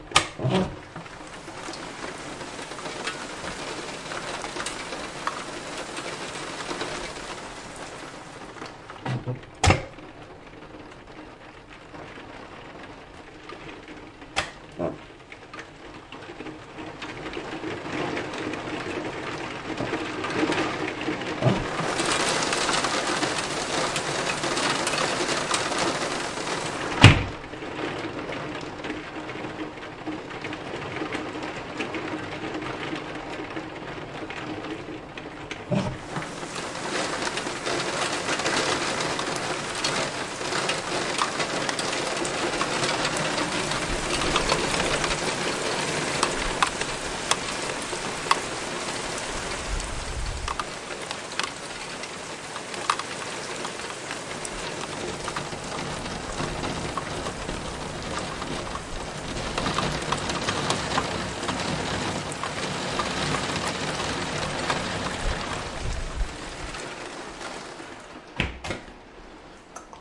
rain on the window open close
Opening and closing window during a rainfall in a flat.
Recorded with Zoom H4n through Rode stereo videomic pro.
close, house, household, indoor, open, rain, weather, window